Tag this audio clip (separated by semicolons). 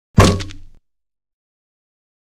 crack; head; porcelain; skull; smash; thud